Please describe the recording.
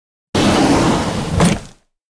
Close drawer - actions
Some Foley i did for a tv-production.
For professional Sounddesign/Foley just hit me up.
close, shut, sound-design, sound, actions, drawer, foley, closing, fast, short